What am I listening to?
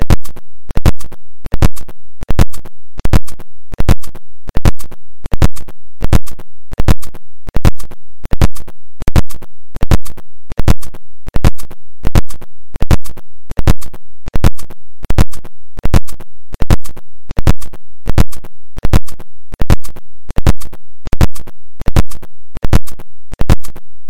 Worlds Most Annoying Noise
This happened when I pulled the mic out of the computer in audacity, this will loop.